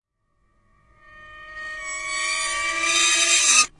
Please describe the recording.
4th dimension banshee death
recordings of a grand piano, undergoing abuse with dry ice on the strings